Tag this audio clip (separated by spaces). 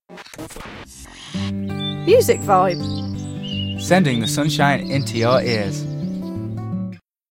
ident radio uni